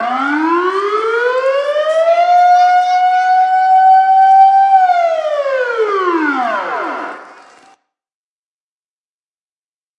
recording sample of a sirene outside
ambiance, field-recording, sirene